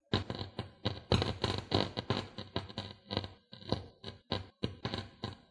Flashing lamp
When lamp was turned on, but can't started , this sound was happend.
For recording used Canon 600D, and sound was extracted with Adobe Audition 5.5